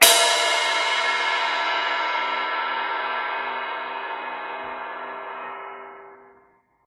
Ting Becken Long
This Ting was recorded by myself with my mobilephone in New York.